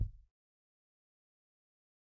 Dirty Tony's Kick Drum Mx 006
This is the Dirty Tony's Kick Drum. He recorded it at Johnny's studio, the only studio with a hole in the wall!
It has been recorded with four mics, and this is the mix of all!
dirty, drum, kick, kit, pack, punk, raw, realistic, tony, tonys